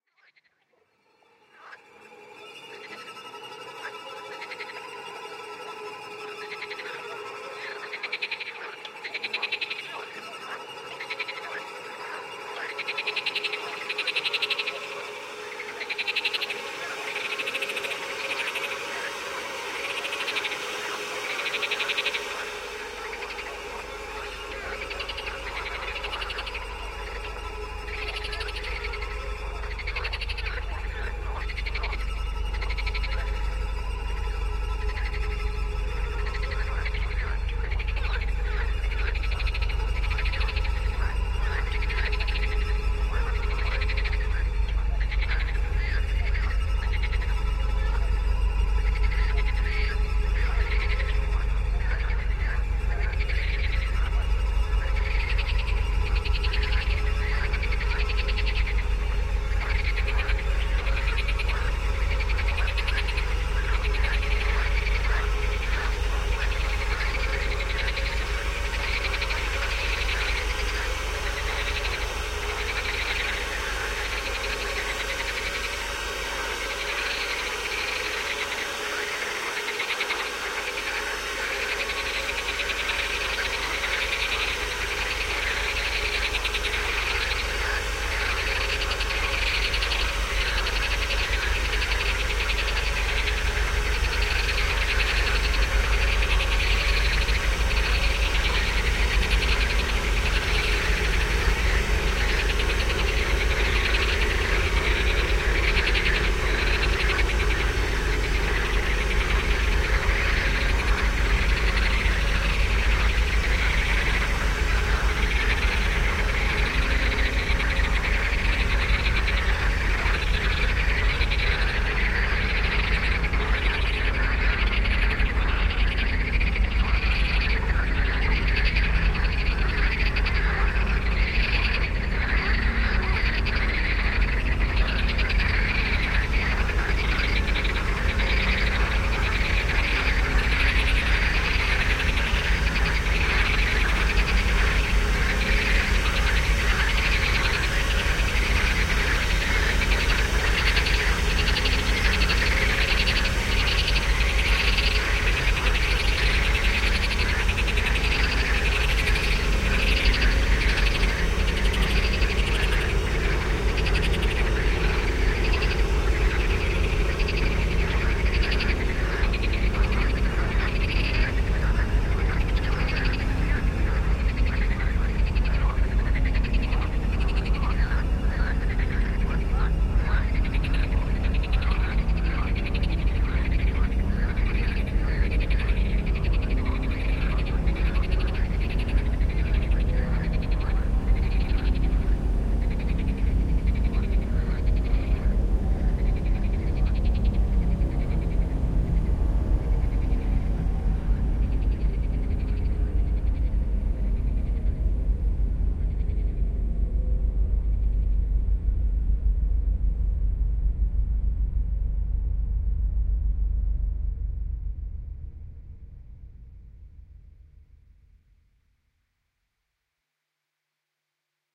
Screaming frogs (+background arrangement)
Original sound in the pack Ambiences. Added a dark and spectacular background music - 2 string instruments and aquaphone layers. Aquaphone's layers with gentle saturation. Frog's track with tape delay modulation effect. Evokes a quite scary scene.
Original sound recorded with Tascam DR 22WL, tripod, windscreen. Processed in DAW and with plugins.
pond, marsh, swamp, scary, frogs, atmosphere, field-recording, film, movie, croak, toads, frog, brno, music, theater, nature, ambience